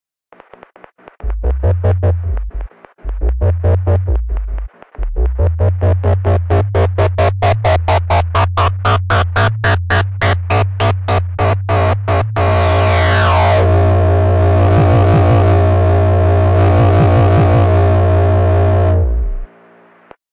Some more Monotron-Duo sounds.
Still using the same settings as on the previous sound. Just playing with the filter on the monotron.